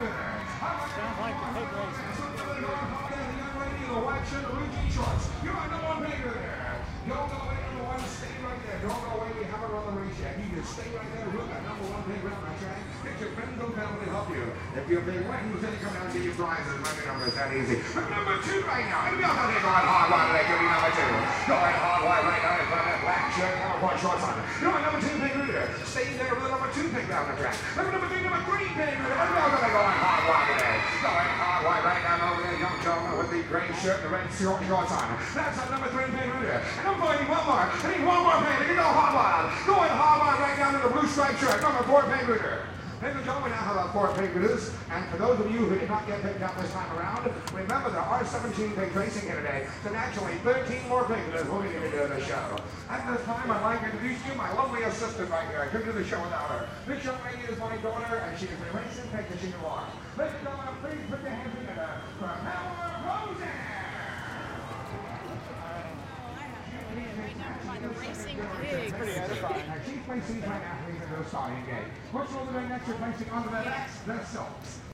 VA State Fair # 8 (Pig Races!)
The auctioneer-like announcer at the pig races.
fair, pig-race, state